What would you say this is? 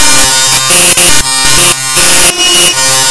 Suck Slush 5
A short series of harsh buzzes and beeps.
glitch, noisy